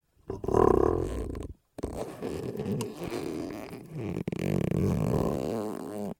squeak rubber stretch
stretching hand on balloon sound